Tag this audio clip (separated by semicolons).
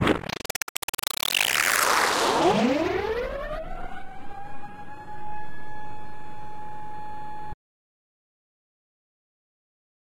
noise; synthesis